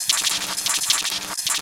a glitchy clicky rhythmic 1-bar loop; made in Audiomulch and Adobe Audition
1-bar, click, dark, electronic, glitch, industrial, loop, processed